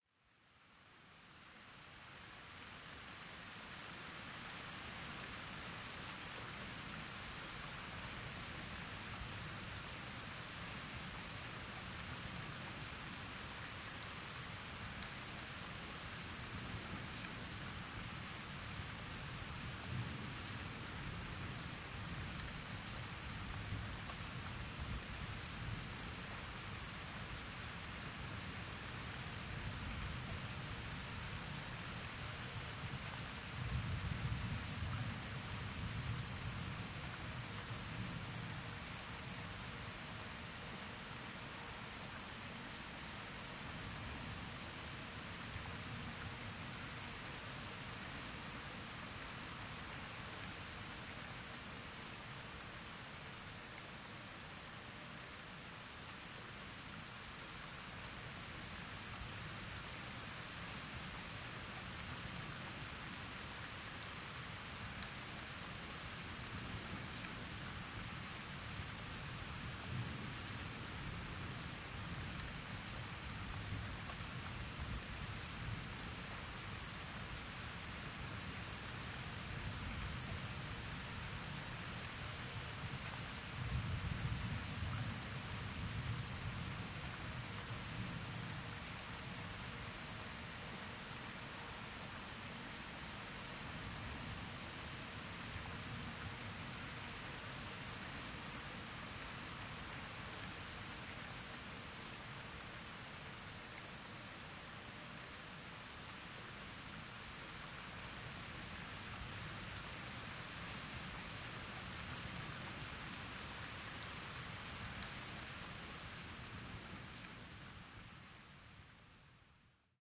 A gentle rain storm